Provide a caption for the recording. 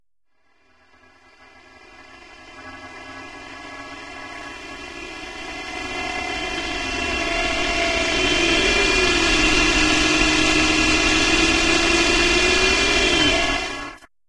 SYnth NoisesAXz
Artificially produced clips to be used for whatever you wish. Mix them, chop them, slice 'em and dice 'em!
Alien, Ambiance, Artificial, machine, Machinery, Noise, strange, Synthetic